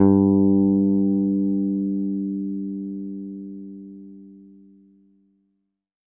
Second octave note.